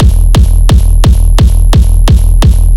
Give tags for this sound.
DRUM 173 HARDTEKK BPM LOOP KICK

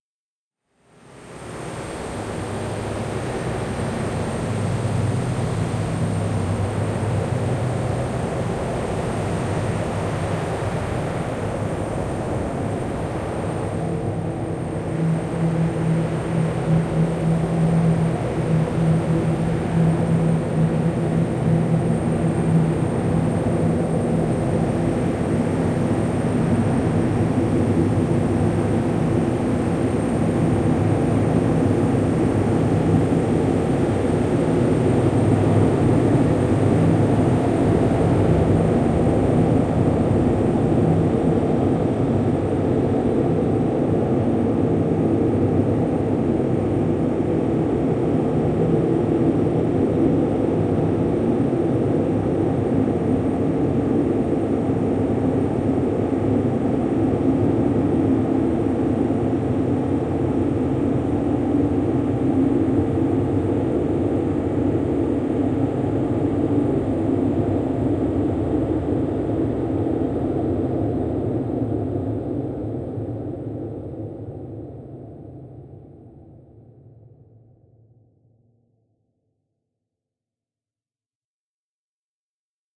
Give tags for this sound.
pad artificial multisample drone space helicopter soundscape

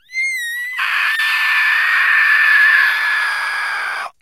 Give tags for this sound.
cry monster screak alien male inhale shriek creature screech animal squall yell human squeal

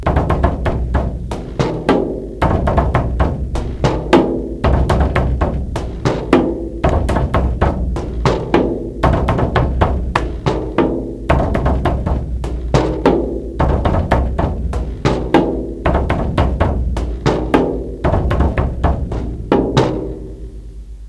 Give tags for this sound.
drums experimental rythms

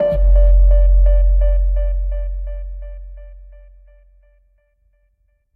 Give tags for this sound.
Stab Bass Piano

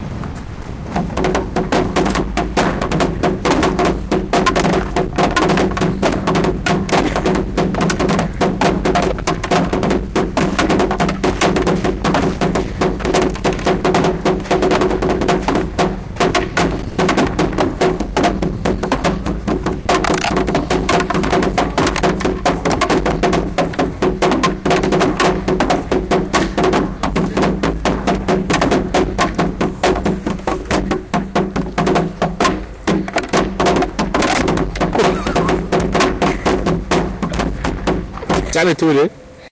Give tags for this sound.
bruitage field-recording workshop

